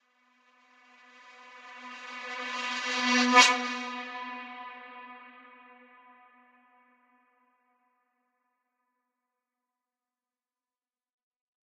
The One Who Knocks Swell
Ominous Snyth swell from my breaking bad inspired track.
[Key: ]
Smoth, Synth-Swell, Film, Synth, Dark, FX, Swell, Public, Evil, Cinematic, Drone, Synth-FX, Synthesizer, Woosh, Sweep, Atmosphere, Breaking-Bad